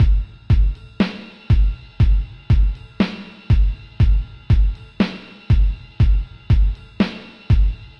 4 Beat 13 slow
slow drum loop
Trip-hop, loop, Triphop